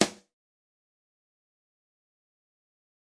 Trigger Snare 1
Drum trigger sample for drum enhancement in recordings or live use.
Recorded at a music store in Brazil, along with other kicks and snares, using Audio Technica AT2020 condenser, Alesis IO4 interface and edited by me using the DAW REAPER. The sample is highly processed, with comp and EQ, and have no resemblance with the original sound source. However, it adds a very cool punch and tone, perfect for music styles like rock and metal.